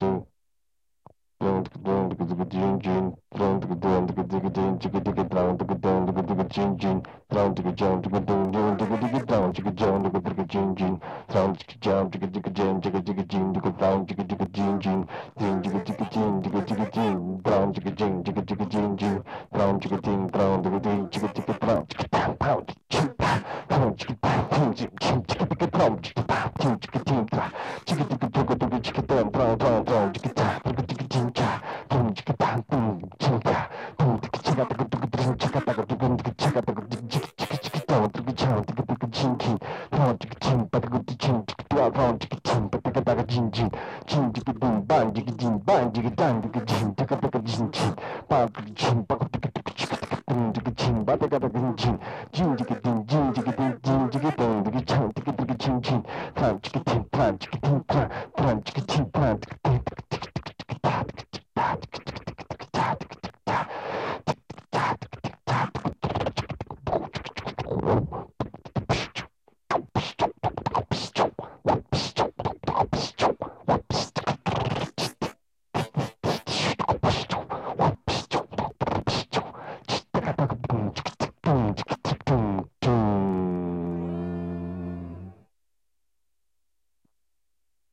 Vocal improvisation 1
Improvisation with my voice and mouth with pedal guitar Digitech RP100 FXs
beatbox, human